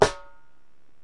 Rim shot hi snap 1
rim shot on my snare with snap on.
drums, hit, live, recording, snap, snare